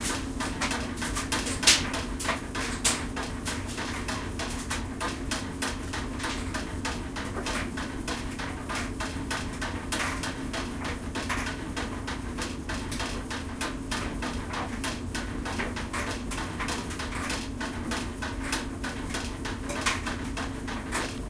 My dryer making rhythm :p
Dryer, Rhythm